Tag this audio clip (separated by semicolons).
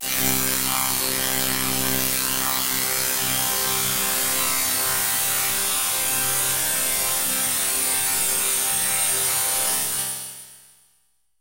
grain; comb; metal